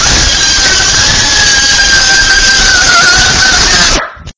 A woman screaming.